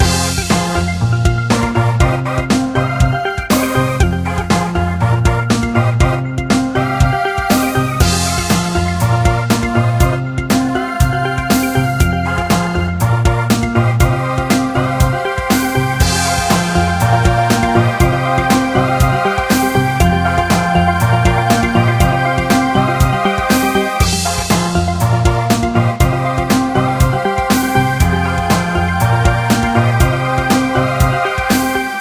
Loop EndlessCorridor 04
A music loop to be used in storydriven and reflective games with puzzle and philosophical elements.
Philosophical, Puzzle, Thoughtful, game, gamedev, gamedeveloping, gaming, indiedev, indiegamedev, loop, music, videogames